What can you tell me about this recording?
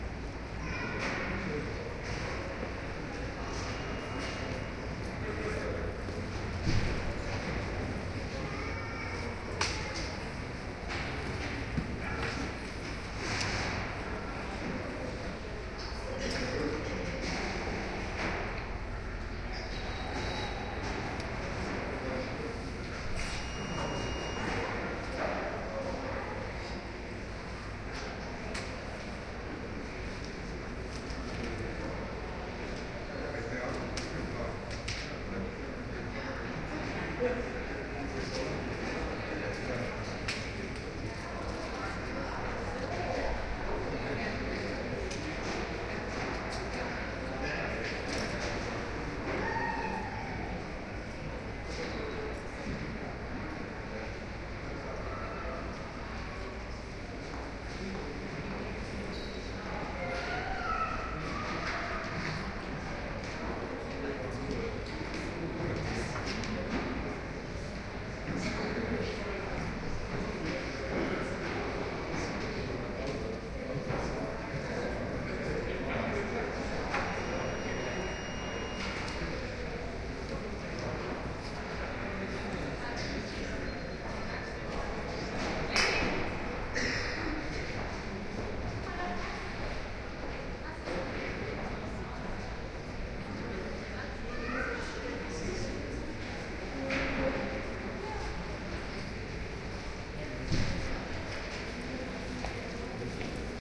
Binaural recording of an airport lounge using some Aevox binaural microphones and an Olympus LS10 recorder.